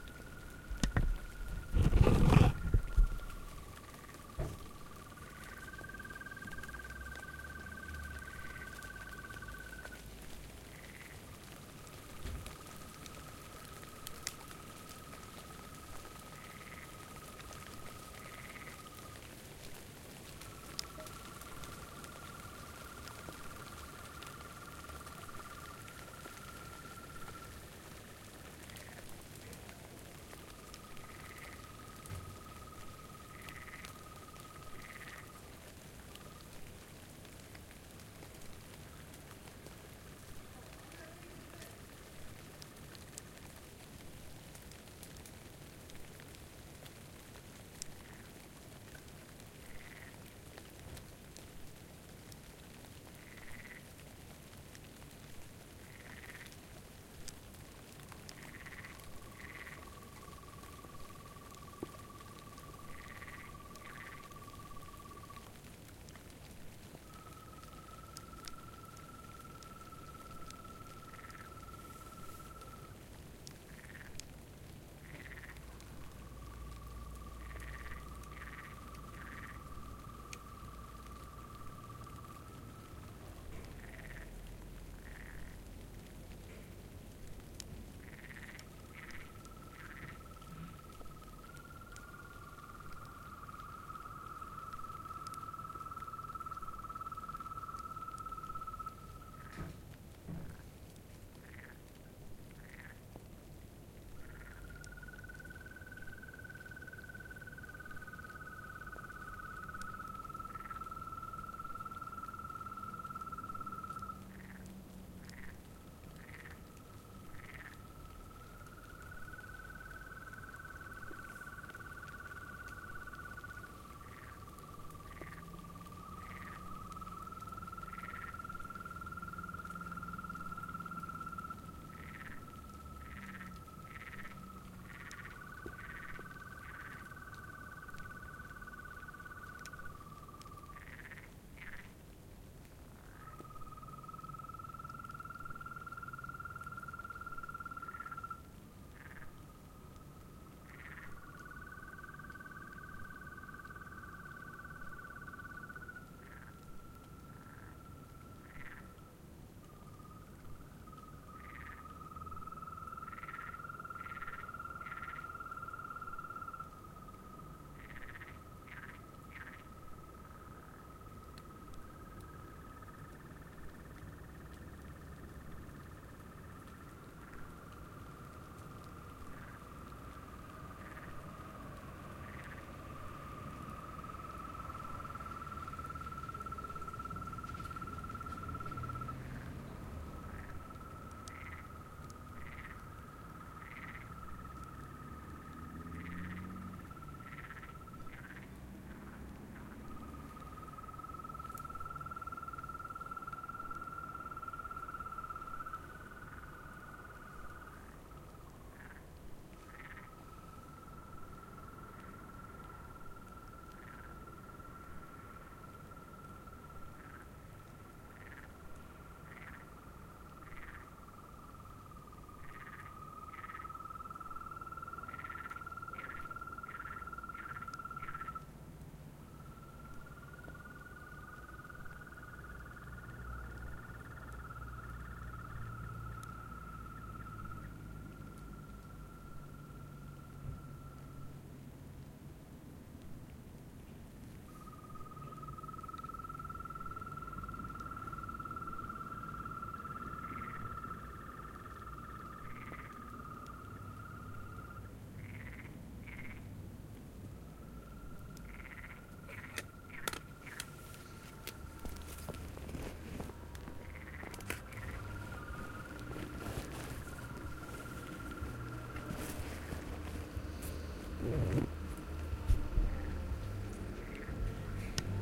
cricket - frog - alien
Very special crickets or/and frogs.
Recorded at night in the reed of mallorca with the internal stereo xy-mics of zoom h4n.
frog, insects, scary, cricket, south, bog, insect, fen, moor, nature, field, field-recording, frogs, reed, alien, weird, crickets, night